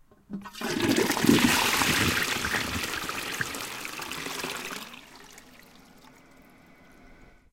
toilet flush
The sound of a small toilet being flushed - recorded just above the bowl using a muffler to avoid phasing from the room reflections. Recorded with a Zoom H2. Intended as to be used as part of an overall soundfield, but may be used singly.